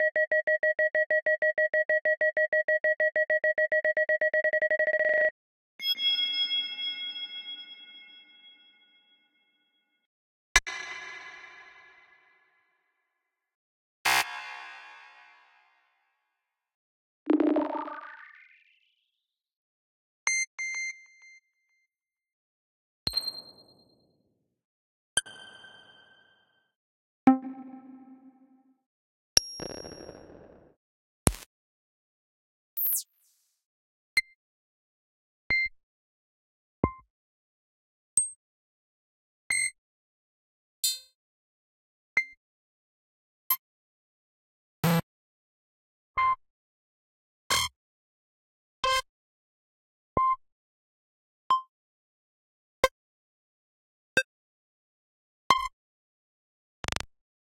Digital UI Buttons Errors Switches Sounds (gs)
Set of digital electronic user interface (also errors and 1 count with acceleration) sounds, synthesized with synthesizer)